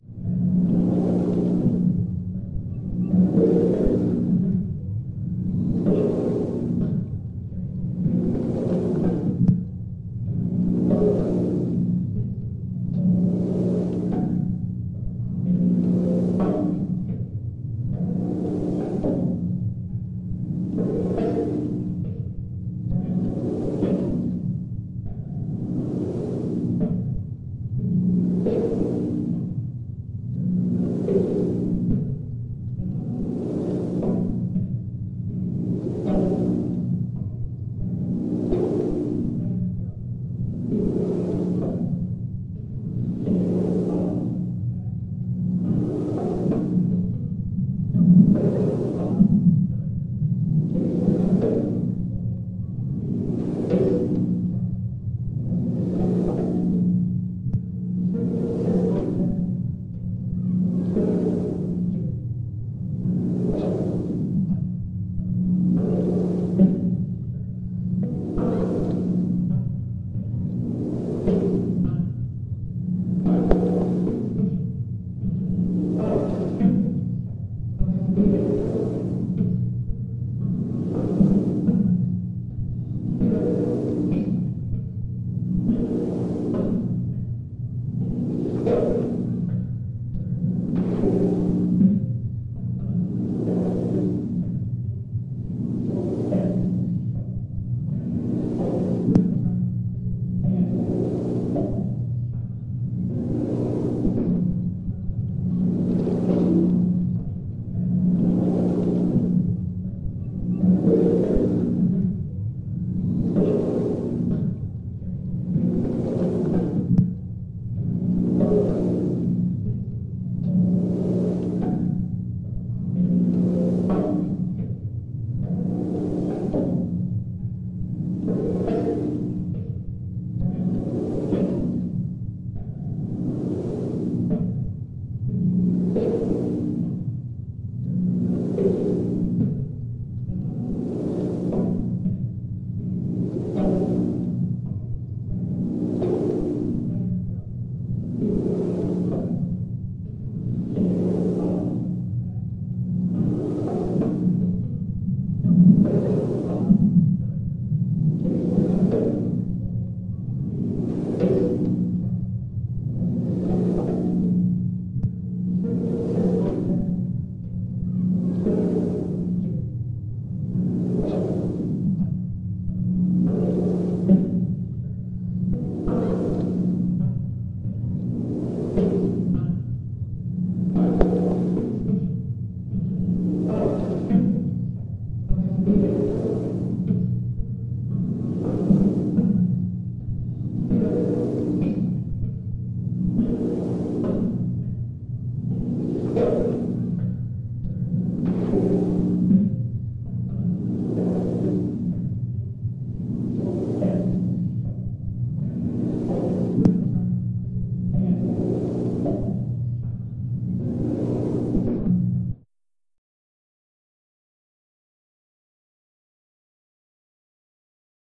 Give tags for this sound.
alien breath haunted radar sci-fi wah weird

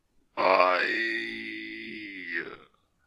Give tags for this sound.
Death Die Zombie

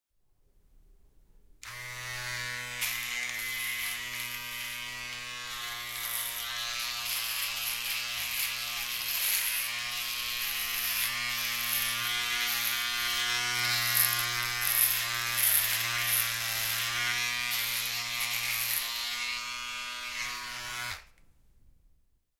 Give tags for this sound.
bathroom beard razor shave shaver

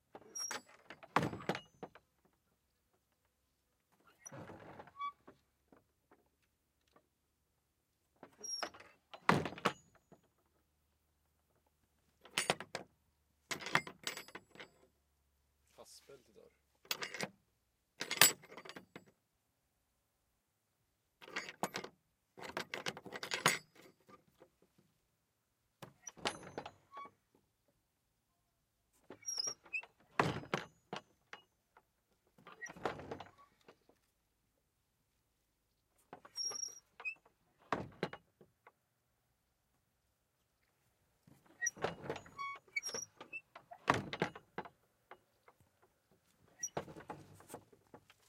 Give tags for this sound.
close door metalhandle open squeak

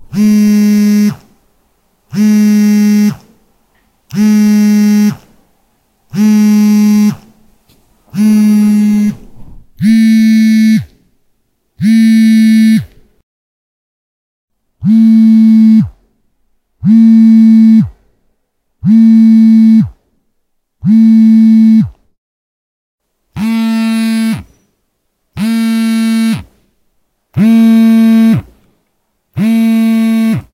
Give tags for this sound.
vibration shake phone

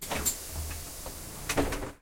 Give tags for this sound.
bus door open transportation

